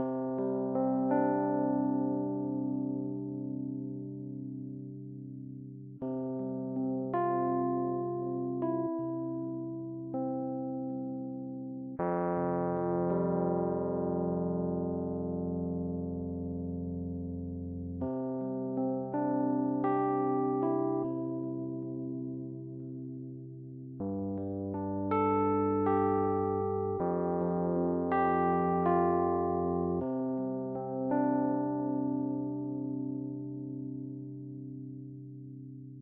Song2 RHODES Do 4:4 80bpms
HearHear, loop, blues, rythm, beat, Chord, Do, Rhodes, bpm, 80